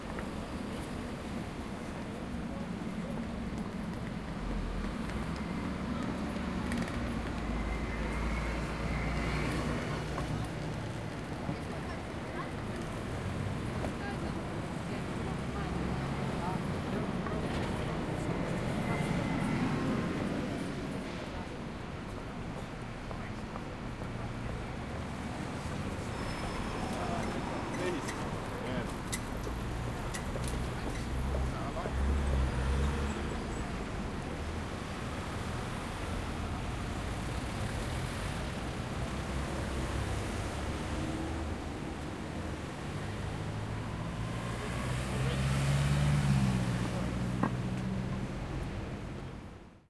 Street City Traffic Voices Busy London
Busy, City, London, Street, Traffic, Voices